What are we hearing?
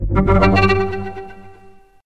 violin impulse
violin processed samples remix